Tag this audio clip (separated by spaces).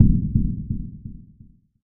bang blow Deep echo hit impact intense percussion stroke tremble